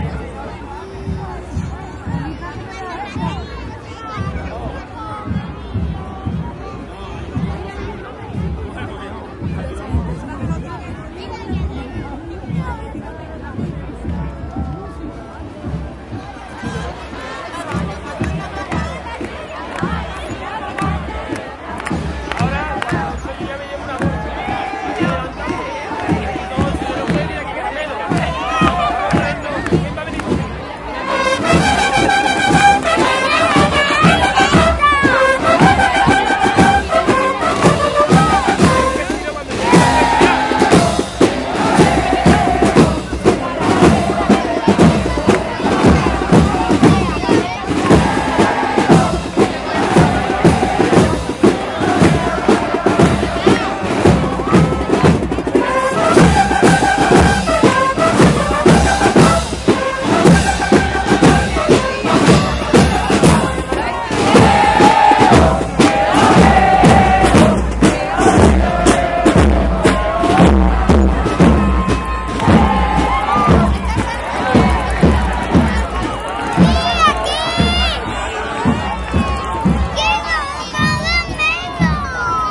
Cabalgata Reyes Magos 2
More crowd sounds while watching the arrival of the three wise men in Seville, Spain.
parade, cabalgata, sevilla